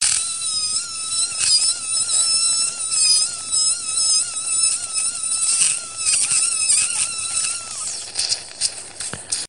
OP Bohrer 18
Geräusche aus einem Operationssaal: Drill noise with clinical operating room background, directly recorded during surgery
Ger, Klinischer, OP, OR, Operating, Operationssaal, Theater, clinical, noise, surgery, usche